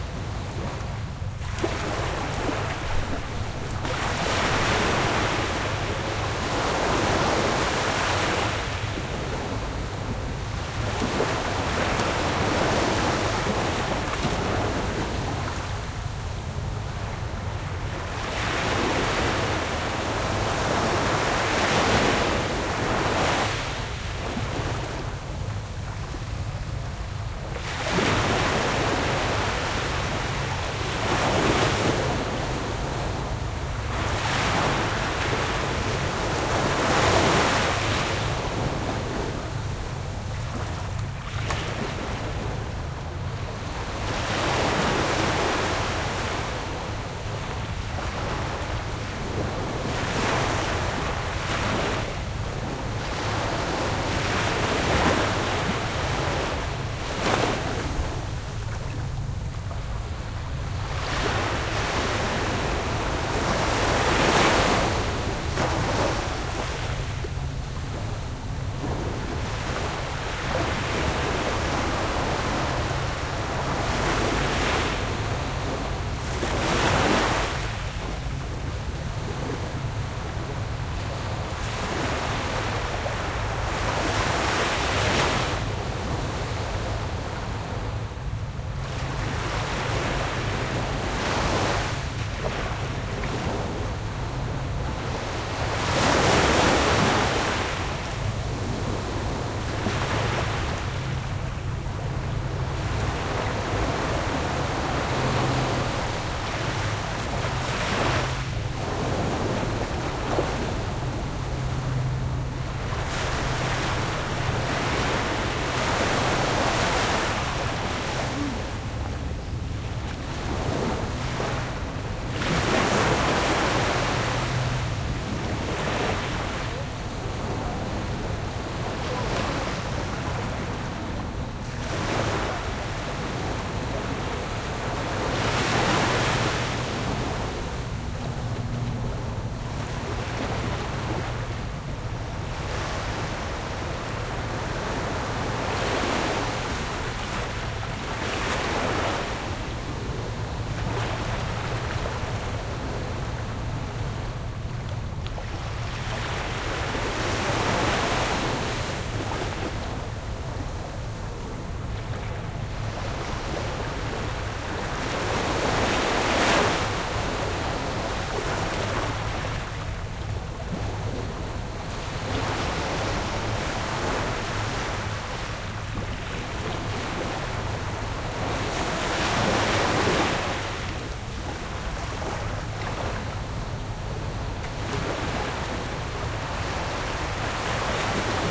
24_48- Stereo-Recording at a local fishing pier. Unfortunately there were some boats on the water and cars on the road; so you can hear both.